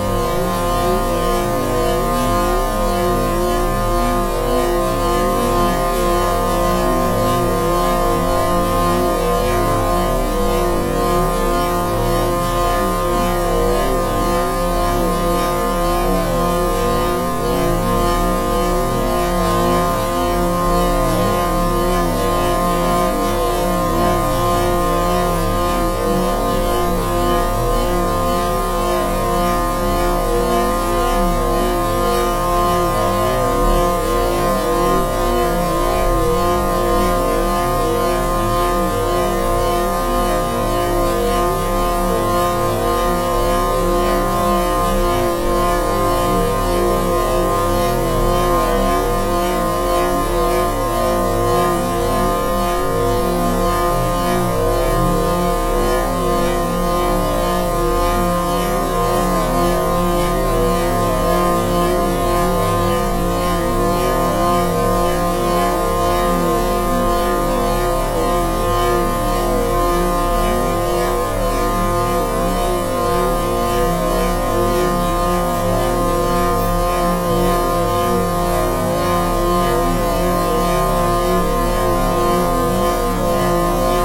ufo ambience normalized
An ambient sound probably ideal for a sci-fi location, such as the interior of a spaceship or some facility.
Stretched and pitch-shifted recording of a washing machine.